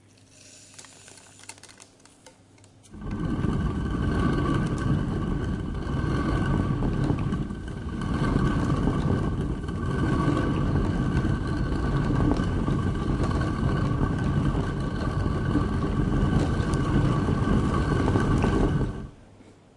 sound of rotary quern grinding bere-meal